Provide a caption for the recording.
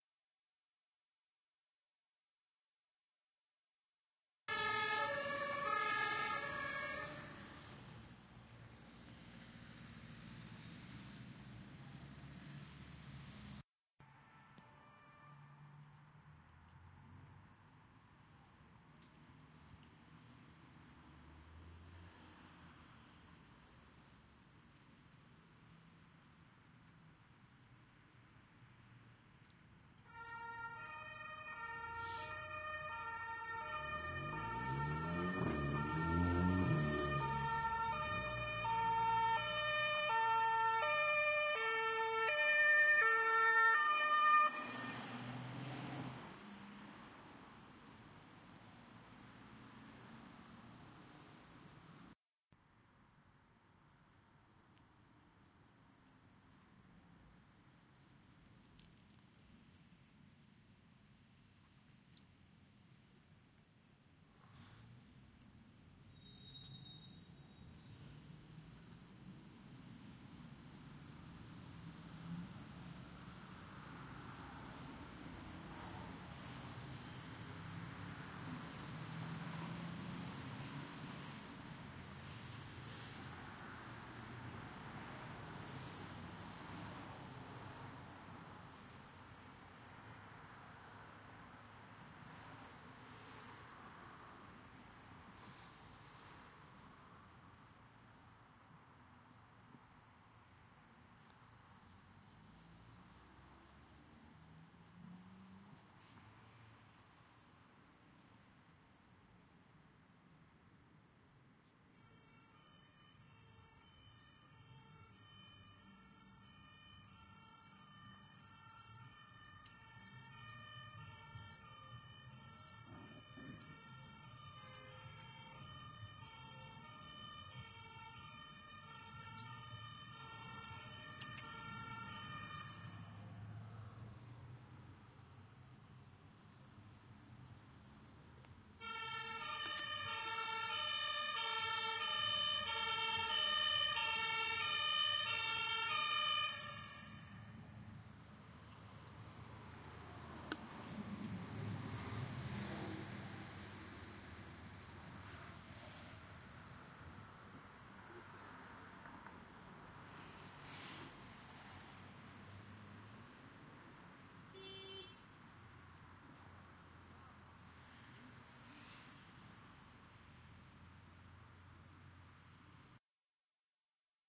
Fire engine Sirens
A collection of Sirens.